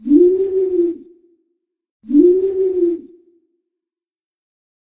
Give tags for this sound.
bird; birds; night; owl; owls

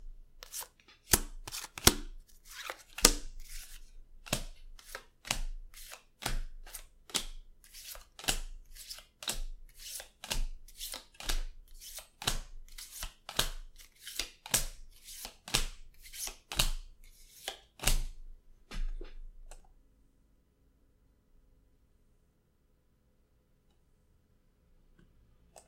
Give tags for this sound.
cards; poker; playing-cards; dealing; blackjack

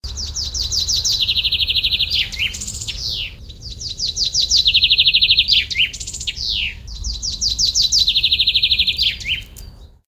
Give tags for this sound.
birdsong; field-recording; spring

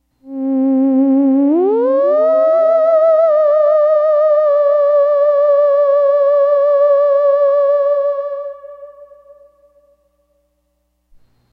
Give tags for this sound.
variation-2; scifi-sound-1

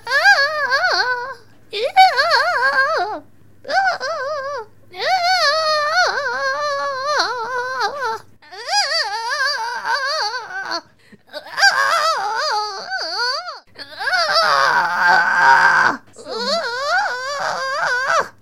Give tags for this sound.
Struggling; Woman

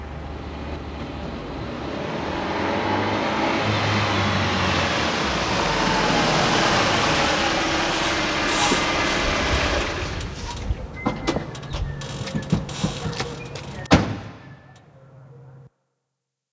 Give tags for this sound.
door; up; speed; stop; car; turn; off; motor

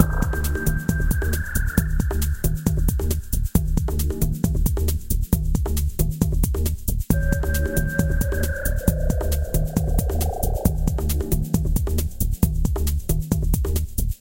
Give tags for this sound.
electri; dance; drumloop; elctronic; loop; dark; underground; tribal; electro; multisample; fx; rhytmic; techno

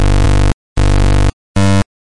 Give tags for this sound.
game; gameboy; gameloop

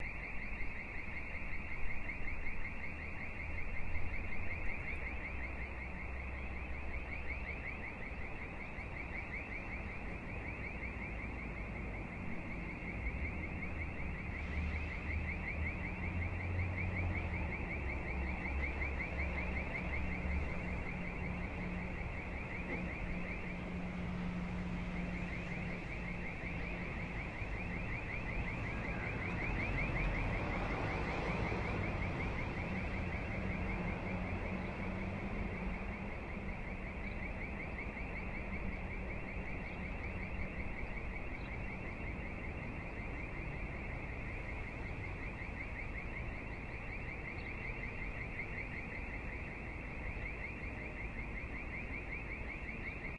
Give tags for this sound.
city; alarm; zoom; h4n; bus

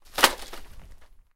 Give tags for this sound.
wooden; pile; drop; dropping; wood; fence

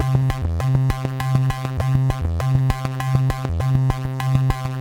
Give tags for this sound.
effect; future; fx; sfx; sound; sound-design

